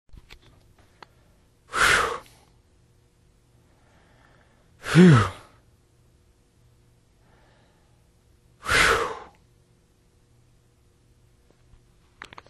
A man exhaling with a "Phew!" as if he is relieved. Used in an animated teacher training video, for a train conductor character who is able to stop the train before hitting some cows on the track.
exhale, male, man, phew, relief, whew